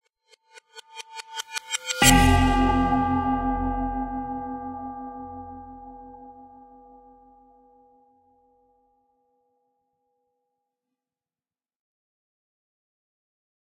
Deep Cympact 2
Tweaked percussion and cymbal sounds combined with synths and effects.
Abstract, Impact, Effect, Tense, Rising, Cymbal, Deep